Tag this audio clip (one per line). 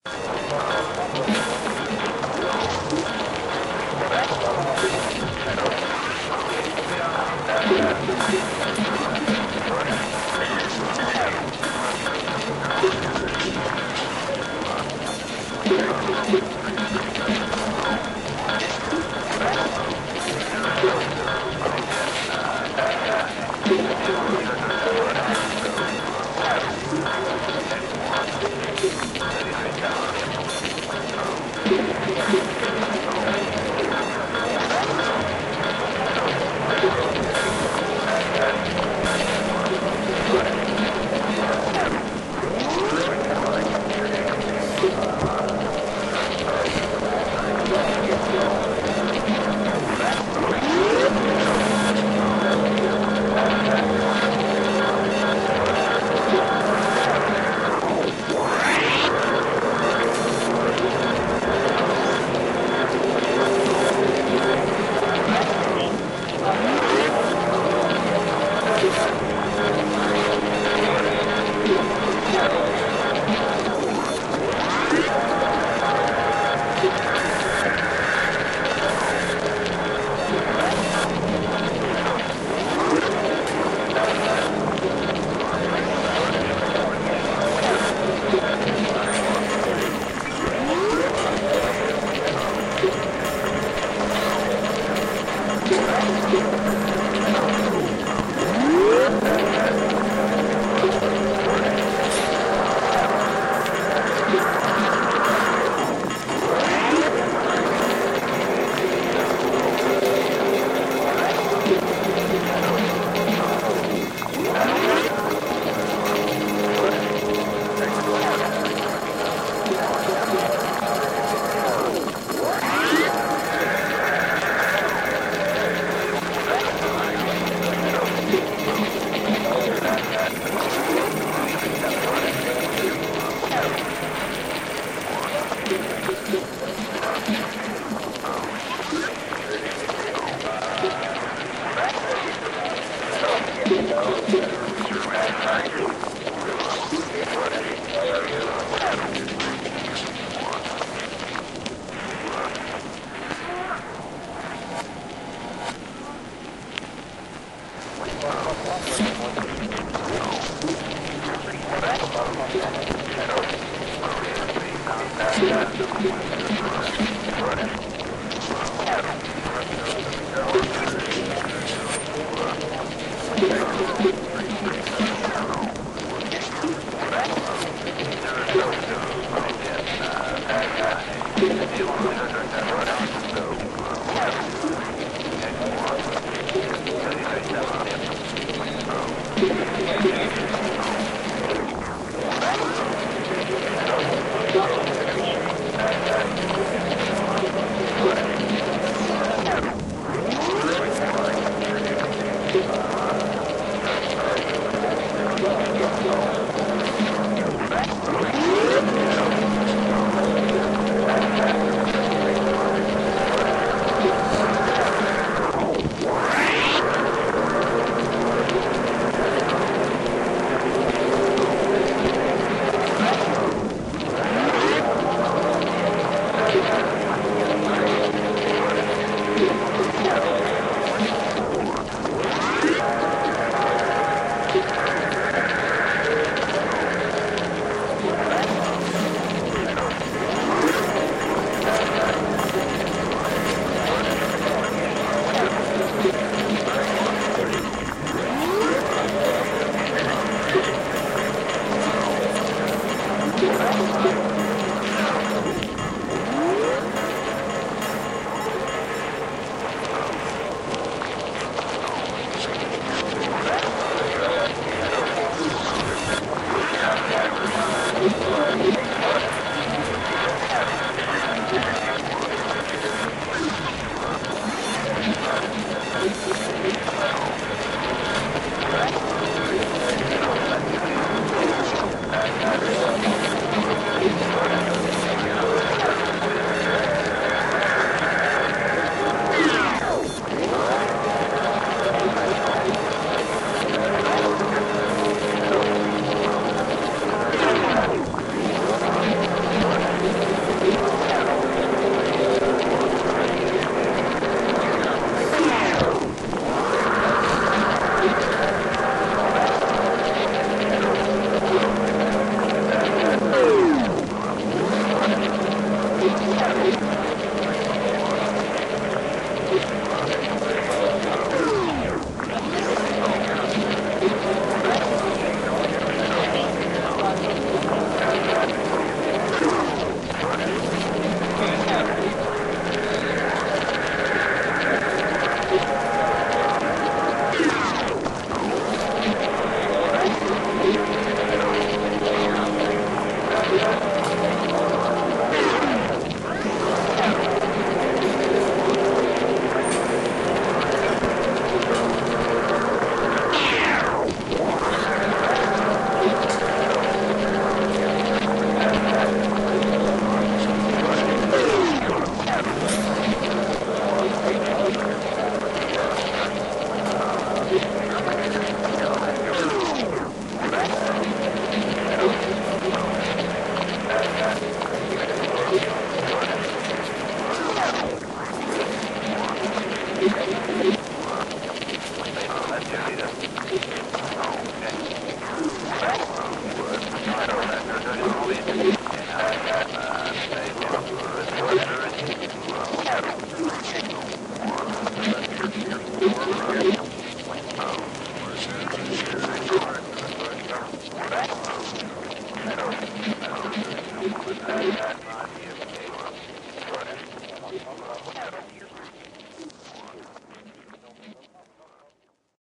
ambient
avantgarde
clicks
cuts
lo-fi
noise
processed